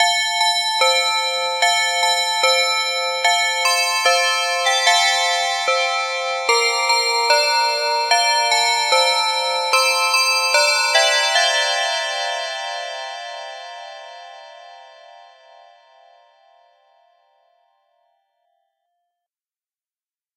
jinglebell chime
chime, jinglebell